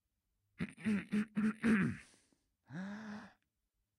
05 dictator inademing
Murmur before starting a speech or sentence. Clearing throat and taking a breath.
effects, gamesound, man, strange